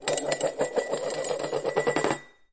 metal bowl - spinning - upside down 02
Spinning a metal bowl on a laminate counter top, with the bowl upside down.
bowl, counter-top, countertop, dish, laminate, metal, metallic, plastic, spin, spinning, spun